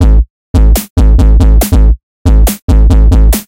Dubstep Loop
Can be used for any speed by moving up tempo enjoy ;)
Dubstep, Beat, Drums, Loop